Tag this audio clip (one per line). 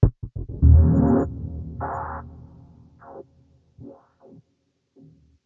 free-music
freaky
future
fx
lo-fi
sci-fi
game-sfx
electronic
machine
electric
soundeffect
abstract
loop
glitch
digital
effect
noise
sfx
sound-design